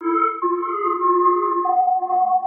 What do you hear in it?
New Tatoo
A Deep under pad type sound.
Mystical, Abnormal, Dark